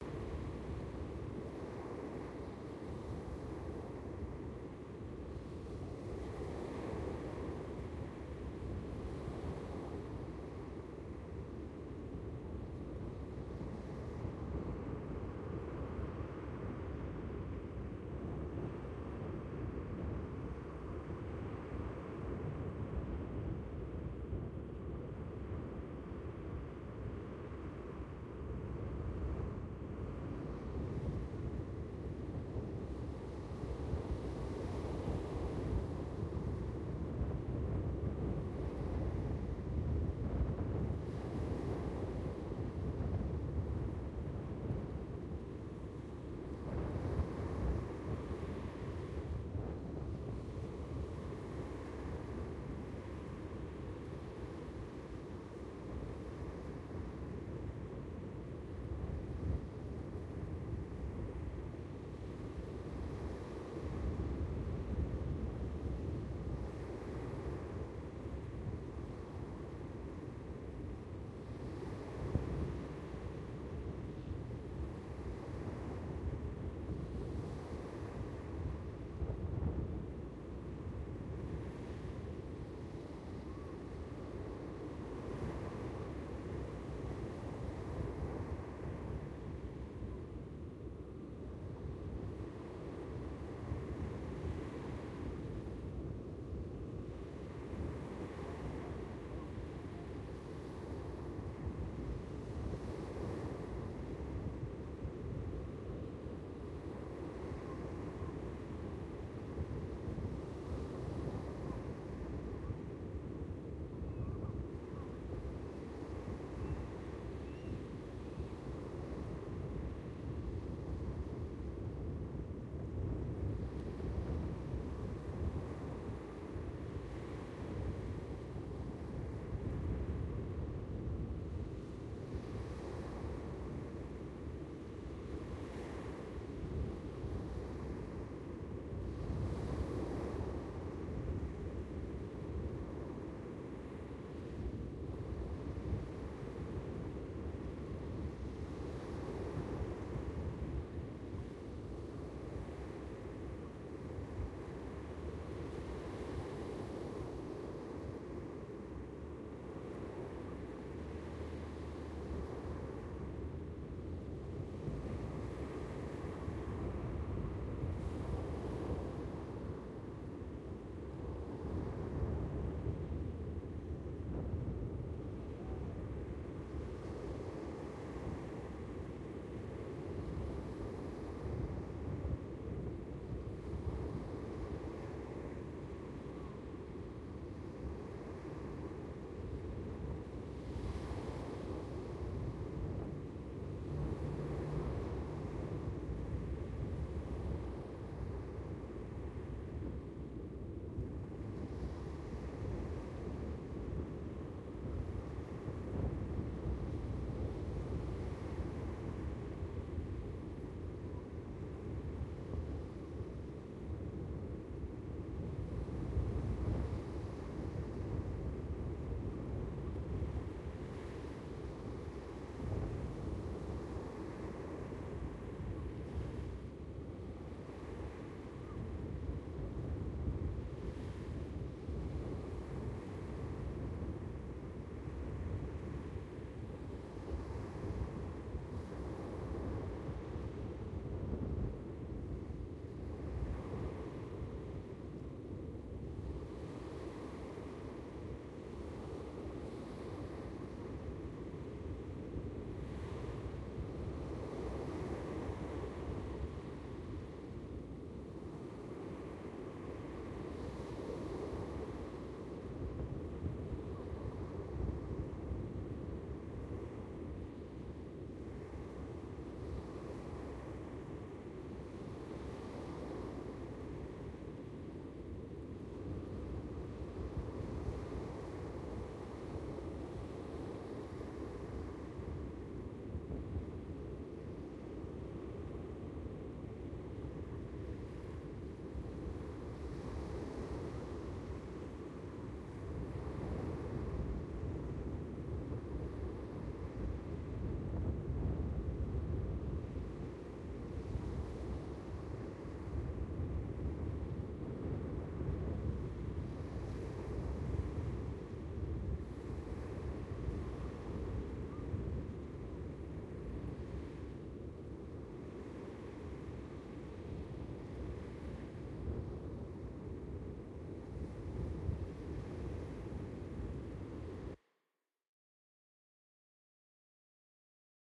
Viento y Olas (voces lejanas)
This sound was recorded in the Peninsula de Paraguaná, Venezuela. It has waves from the beach and some wind, you can also listen to distant playing children voices. Recorded with a Roland Edirol r44 int stereo mic. Enjoy!
nature, summer, water, wind